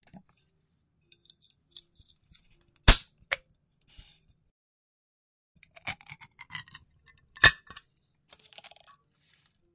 pulling Al can cover
I pulled an aluminium can lit in a room.
can, lit